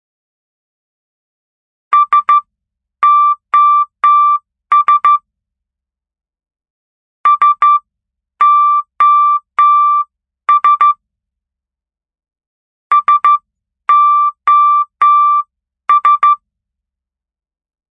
S.O.S in morse
code, morse, O, radio, rescue, S